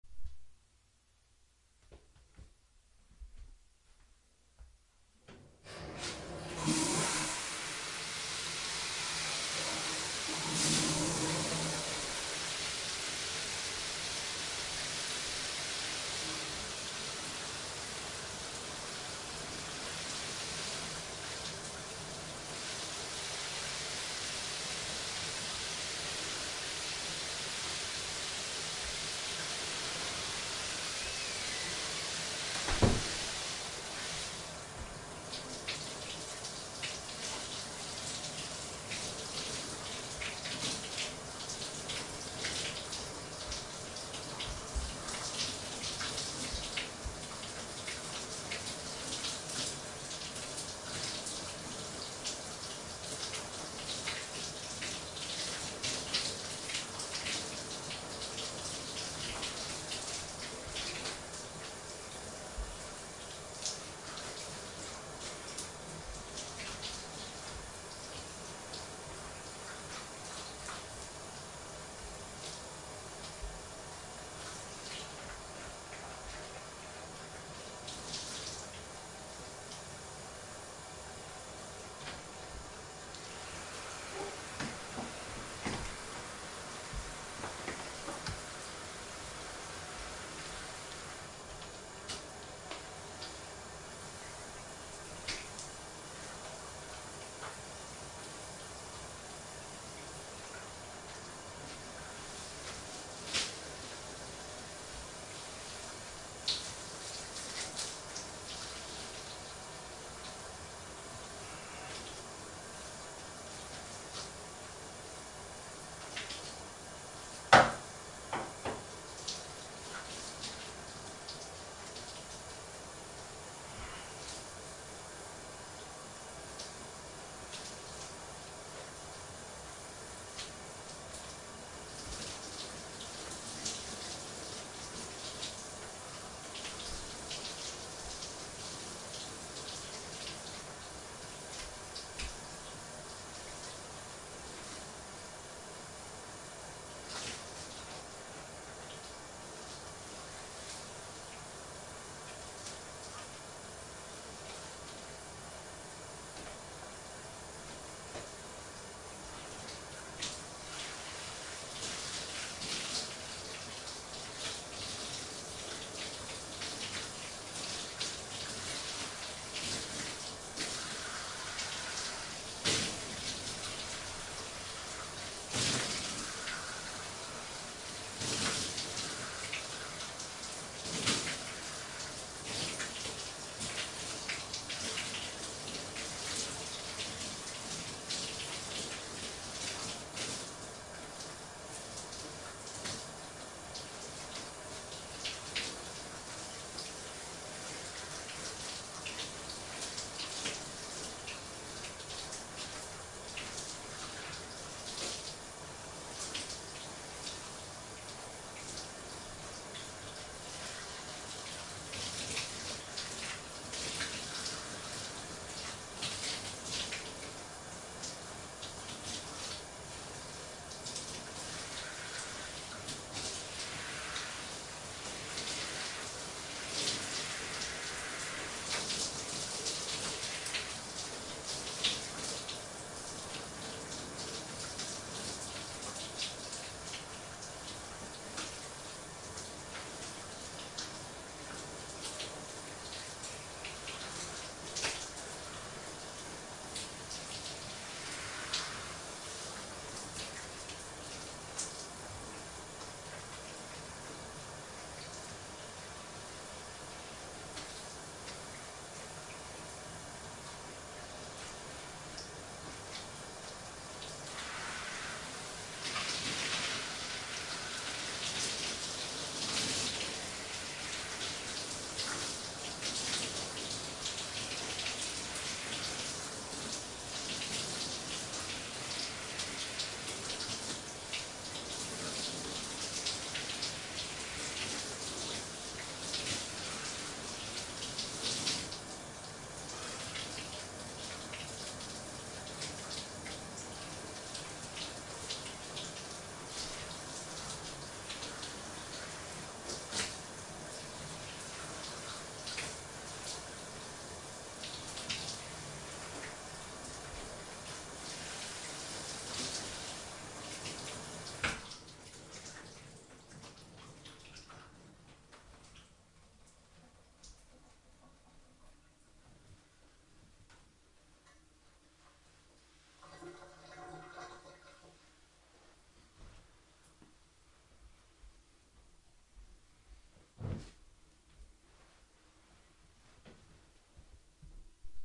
Someone taking a shower from start to finish. Recorded with a Tascam DR-1.